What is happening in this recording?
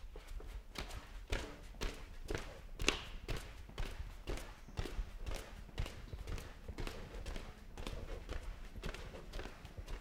indoor footsteps on a wooden floor